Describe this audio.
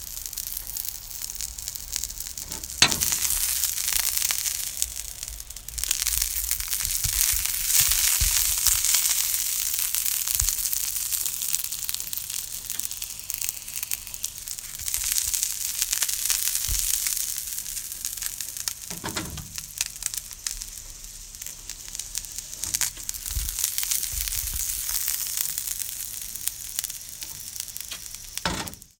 Sausage in oily frying pan sizzling
Recorded some good ol' sausages sizzling in some oil
cook, cooking, food, fry, frying, frying-pan, kitchen, oil, pan, sausage, sizzle, sizzling, stove